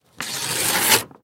rasgar papel en linea recta de forma controlada
control, paper, break